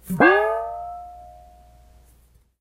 canpop6raw
Pringle can recorded from inside and out for use as percussion and some sounds usable as impulse responses to give you that inside the pringle can sound that all the kids are doing these days.
can, percussion